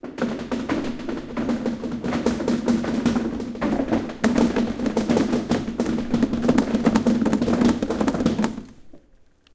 SonicSnap GPSUK Group10 trays
cityrings, galliard, sonicsnap